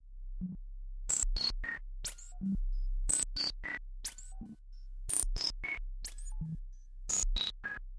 made with reason's malstrom.